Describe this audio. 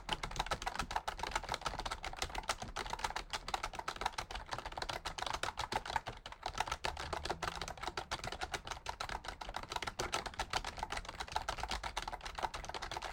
typing quickly on old hp keyboard